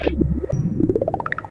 VolCa SaMple ModulaR EfecTo 52
Sounds made with modular synthesizers Clouds Texture Synthesizer & Marbles Random sampler & Semi Modular Synthesizer Behringer Crave.
Make Noise ;=)
KiT, Sample, SoMaR, Volca